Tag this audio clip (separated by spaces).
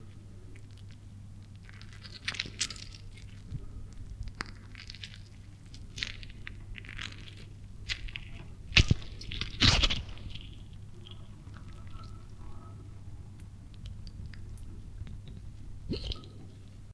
fx bones horror arm limbs horror-effects neck effects break flesh squelch torso horror-fx leg